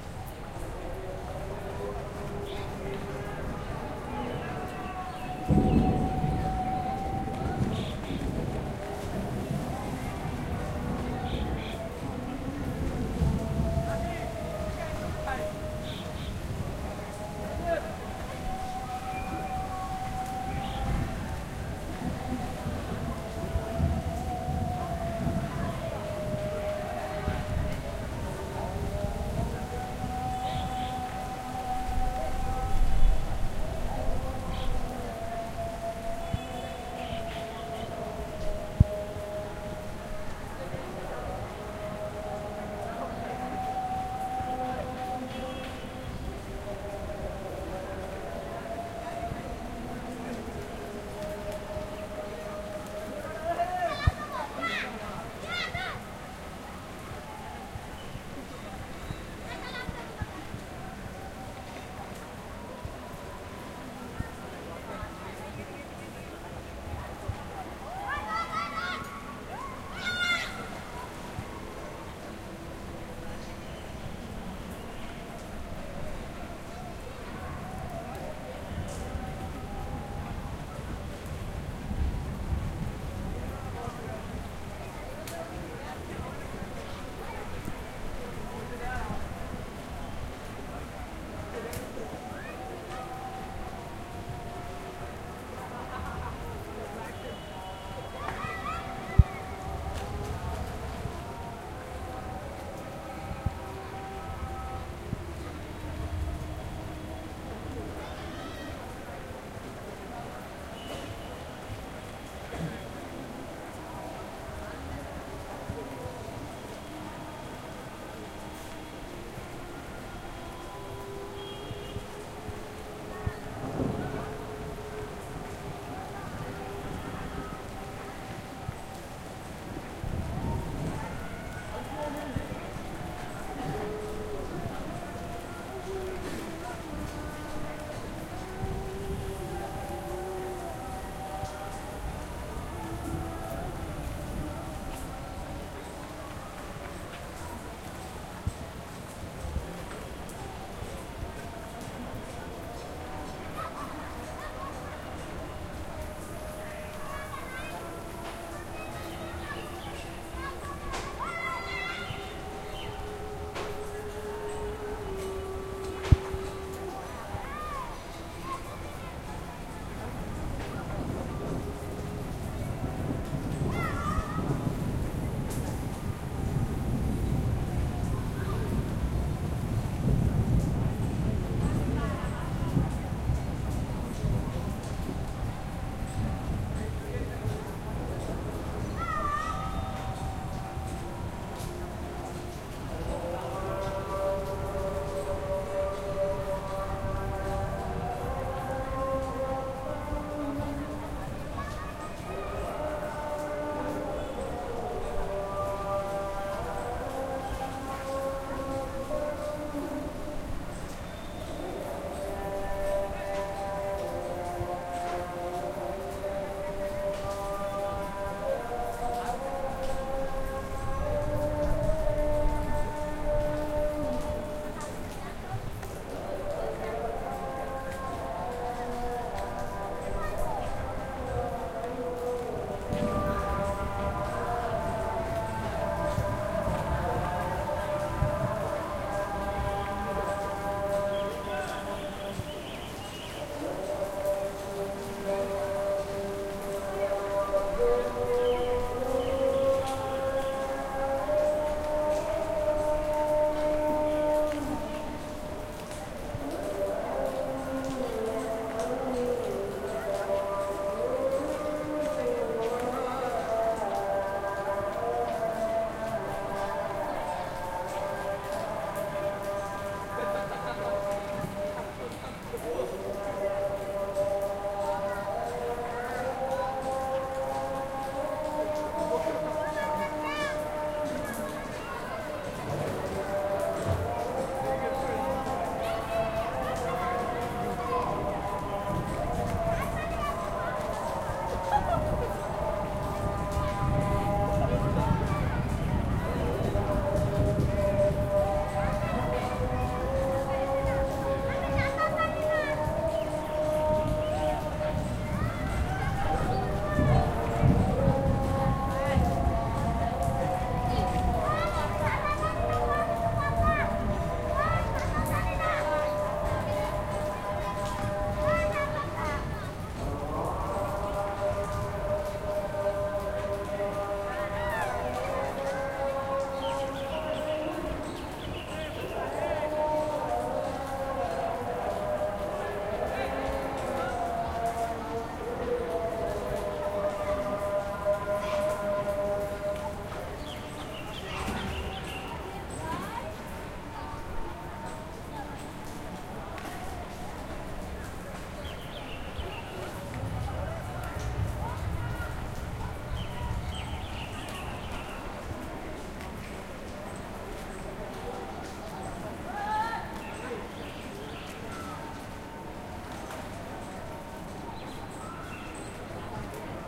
recorded with H4nZoom, in Khulna, Bangladesh. Light rain & thunder, kids playing in the streets, and muezzins, trafic sounds & works at background.